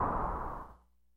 Nord Drum NOISE 1
Nord Drum mono 16 bits NOISE_1
NOISE1, Drum, Nord